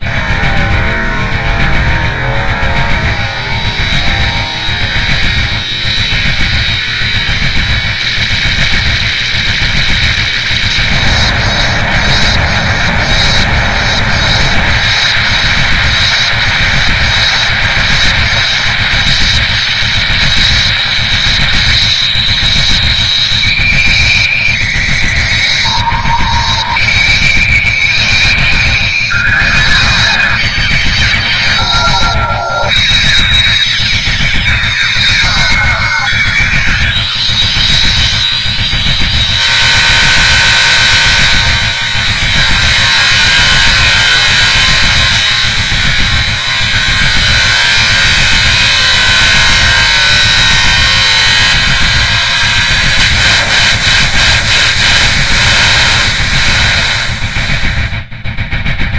Modular Noise Bits 7
These samples were cut from a longer noise track made in Glitchmachines Quadrant, a virtual modular plugin. They were further edited with various effects.